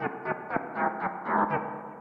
Loops created by cut / copy / splice sections from sounds on the pack Ableton Live 22-Feb-2014.
These are strange loops at 120 bpm. Hopefully someone will find them useful.